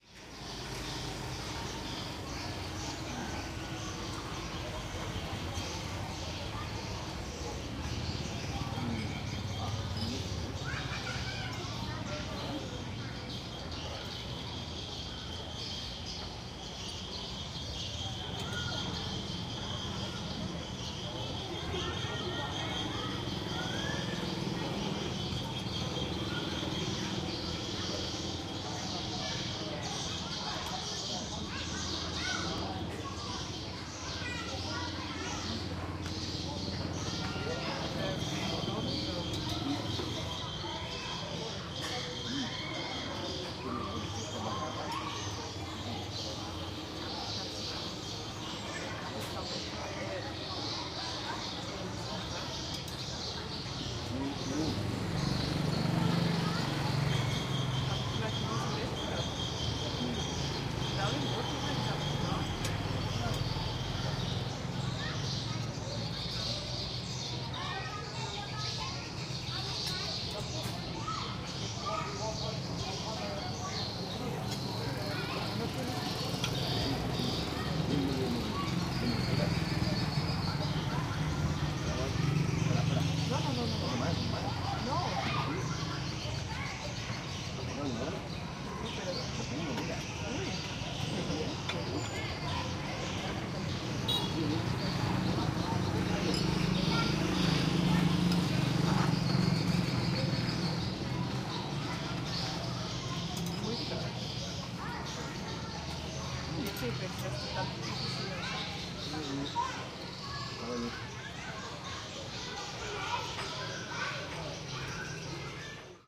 Street, traffic, a cafeteria and some noisy birds
Street with noisy birds, some traffic and a cafeteria in Kampot, Cambodia